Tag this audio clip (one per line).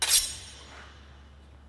foley
movie
slash
slice
sword
sword-slash